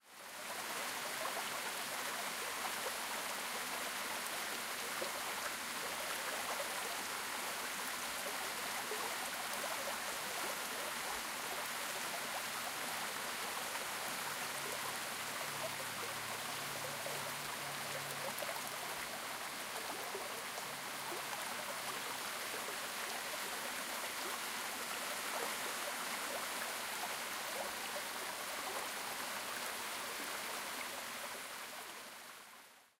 Waterfall Small with Water Stream
Small Waterfall in a Park near by my House.
Waterfall, Stream